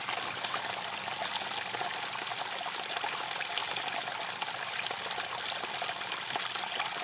little river
h4n X/Y
little, river